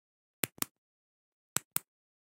short clip of me clicking a retractable pen. The clip includes the sound of the initial click when extending the pen and the second sound of me retracting the pen. recorded using a single Rode NT-5 approx 3" aimed directly at the action on the pen.
No processing used.